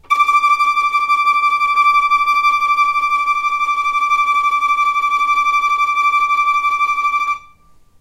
violin tremolo D5
tremolo, violin